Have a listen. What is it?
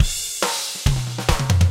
drum roll
deathstep, drum, fill, roll, barron, dubstep